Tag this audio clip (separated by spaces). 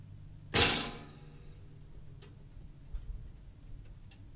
pop machine toast toaster bread